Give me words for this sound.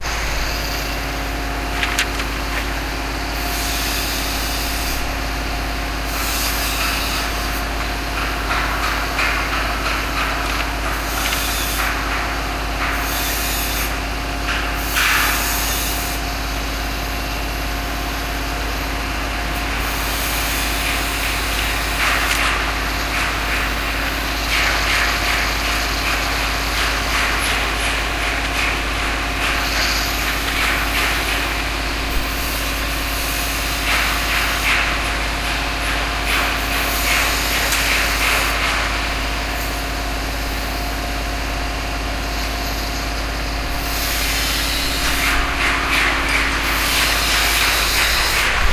Obres Pla a Catalunya (2)
This sound was recorded with an Olympus WS550-M and it's the sound of the Catalunya square's works during the build of the new structure.
noise, works, square